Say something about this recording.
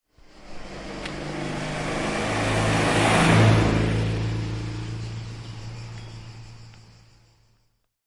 CAR (CITROEN 2CV)
Sound of a car, a Citroën 2CV, which is passing on a french's countryside road. Sound recorded with a ZOOM H4N Pro and a Rycote Mini Wind Screen.
Son d'une 2CV passant sur une route de campagne. Son enregistré avec un ZOOM H4N Pro et une bonnette Rycote Mini Wind Screen.
2cv, acceleration, automobile, citroen, deuch, deux-chevaux, engine, vehicle, vroom